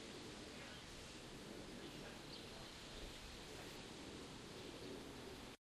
newjersey OC birds3 24th
Ocean City birds recorded with DS-40 and edited in Wavosaur.
ambiance, bird, field-recording, new-jersey, ocean-city, vacation